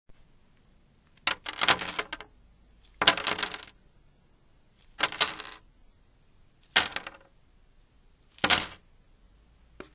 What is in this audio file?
puzzle pieces 2
Jigsaw puzzle pieces being dropped onto a table, several versions
drop, puzzle-pieces, puzzle, rattle, pieces, jigsaw